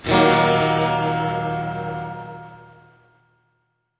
My friend's acoustic electric direct to sound card around 1996. Processed with some chorus in cool edit 96. I believe it was an Ibanez?
acoustic, chord, electric, guitar, environmental-sounds-research